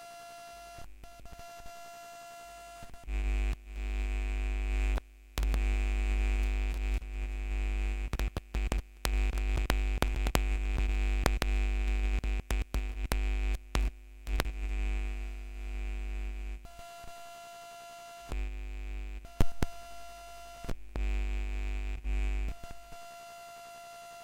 Pulling the JACK Cable Plug
An attempt to record the interference that you hear when pulling out a JACK plug out while the sound system is still on.